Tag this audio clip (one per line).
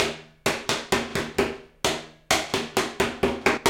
130-bpm
acoustic
ambient
beam
beat
beats
board
bottle
break
breakbeat
cleaner
container
dance
drum
drum-loop
drums
fast
food
funky
garbage
groovy
hard
hoover
improvised
industrial
loop
loops
lumber
metal
music